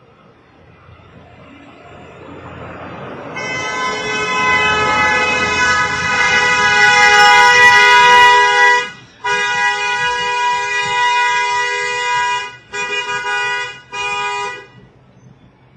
car horn
A car passes honking
beep, car, cars, city, honk, honking, horn, horns, streets, streetsound, traffic